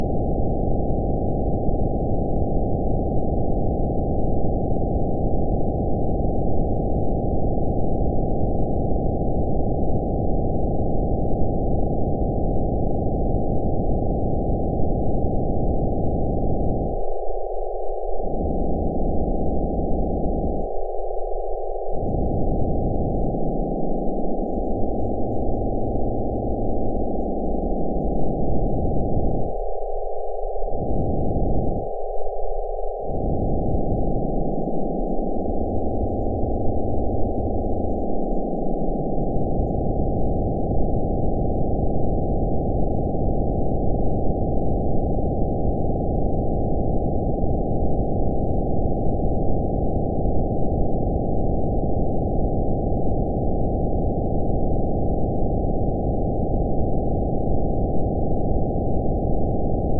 The word 'hello' in a black, bold font, run through AudioPaint 3.0